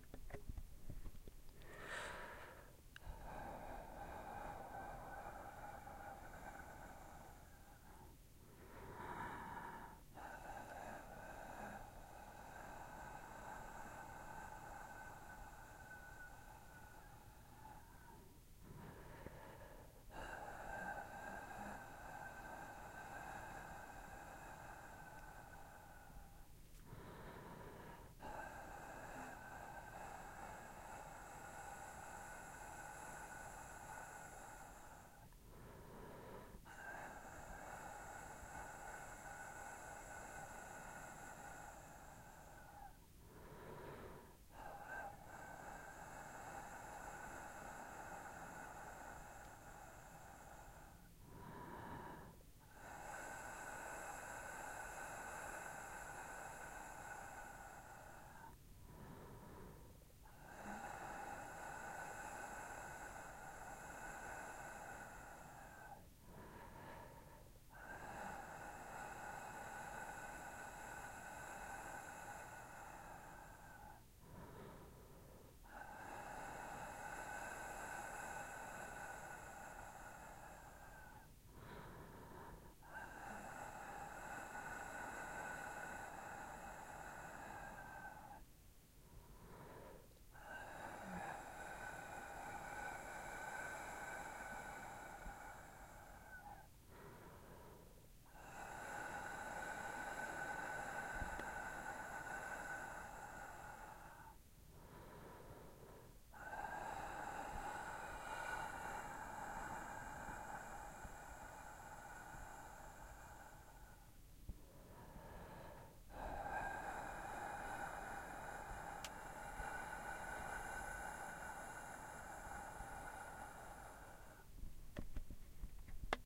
breathing into mic
air, human